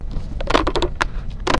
strumming a rubberband
rubberband, strum